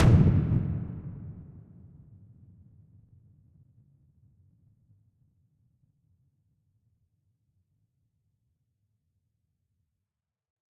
Frequency Impact 03
Synthesized using Adobe Audition
frequency, synthesis